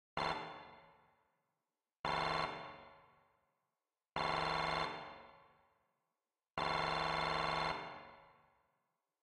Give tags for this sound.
cool; sound-fx; nice; effect; typing; sounds; rpg; dialogue; dialog; game; interface; mmorpg

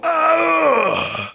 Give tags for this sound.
die; field-recording; player

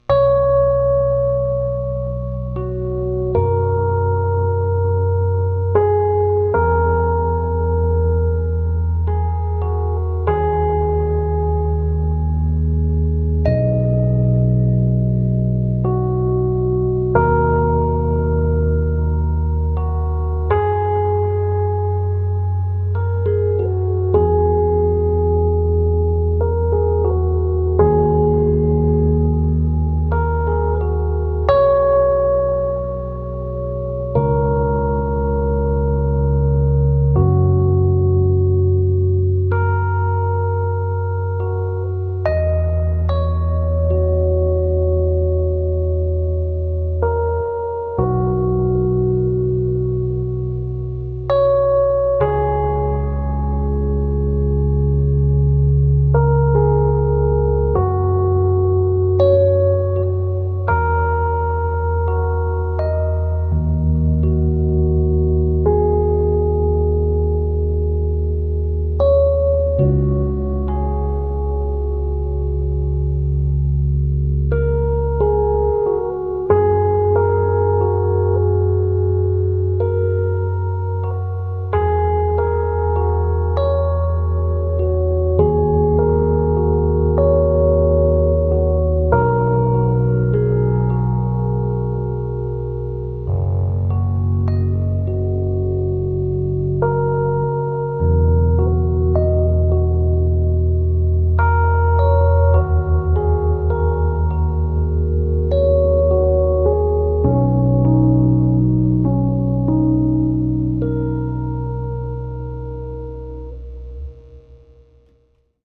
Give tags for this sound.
ambient; random

